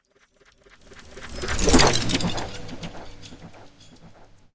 This is a stereophonic doppler effect of something mechanical passing from right to left. Excellent sound effect for video transitions and games.